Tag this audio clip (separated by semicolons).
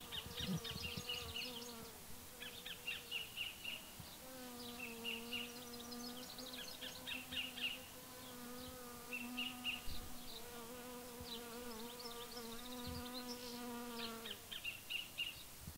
bees; birds; Kingfisher